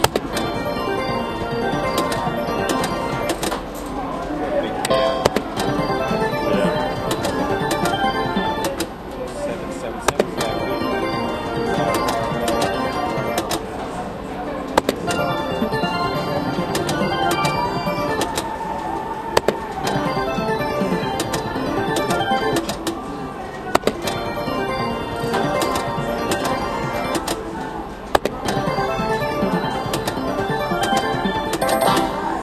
Slot machine noises, clicking, spinning wheel, two guys in background talking, background music,